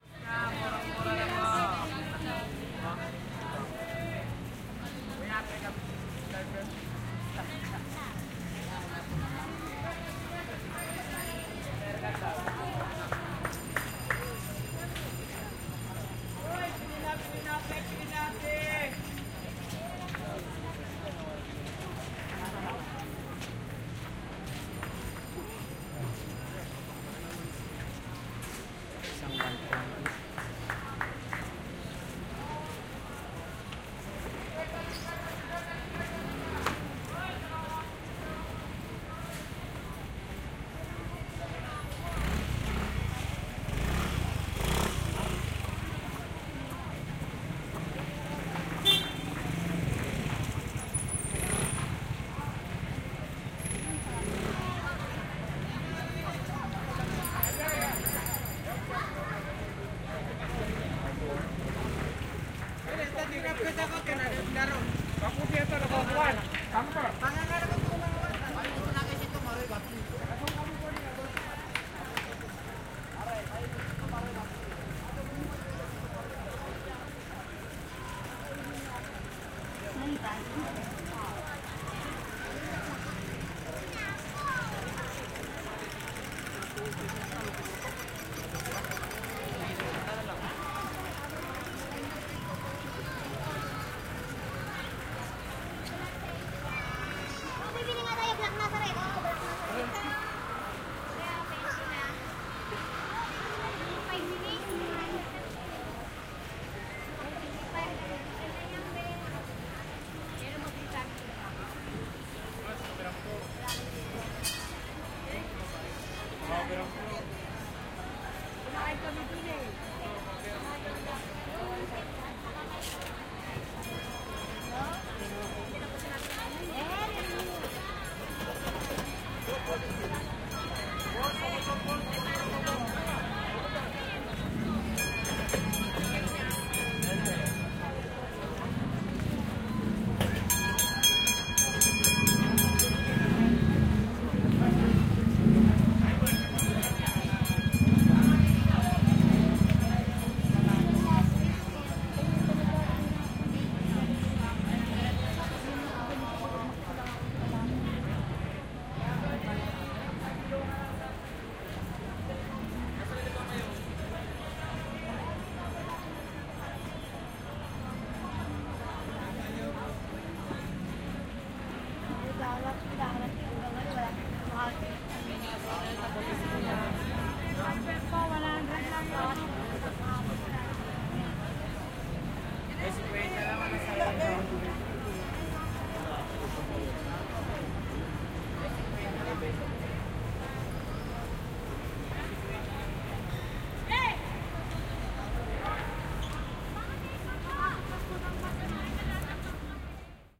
LS 34213 PH QuiapoWalk
Walking in Quiapo, Manila, Philippines. (binaural, please use headset for 3D effect).
I made this binaural audio recording while I was walking in a street of Quiapo (Manila, Philippines), going to the church where we can see the famous statue of the Black Nazareno. (The Black Nazareno is a Statue of Jesus Christ venerated by many Filipino people for its miraculous power)
At the beginning of the file, I’m walking in the street and you can hear many sellers around (very interesting soundscape according to me), and at the end of the recording, I’m arriving in the church where is located the Black Nazareno.
Recorded in January 2019 with an Olympus LS-3 and Soundman OKM I binaural microphones (version 2018).
Fade in/out and high pass filter at 80Hz -6dB/oct applied in Audacity.